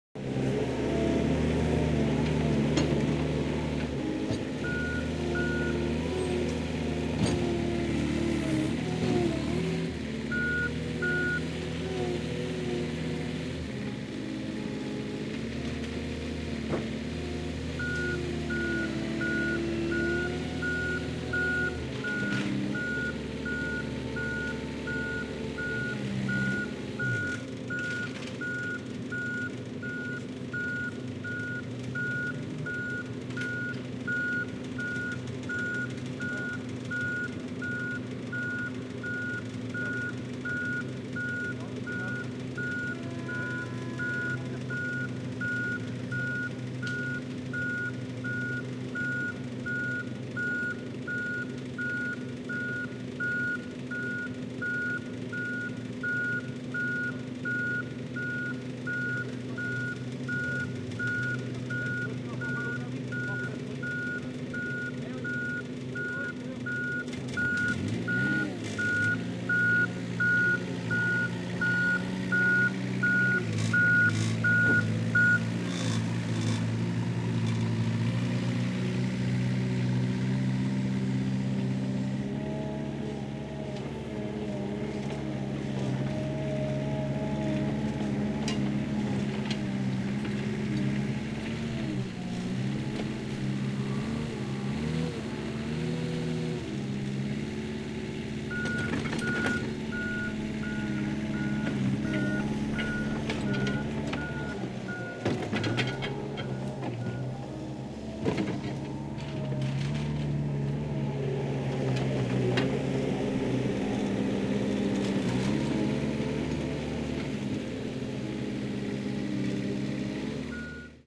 Pelleteuse(st)
A digging machine working recorded on DAT (Tascam DAP-1) with a Rode NT4 by G de Courtivron.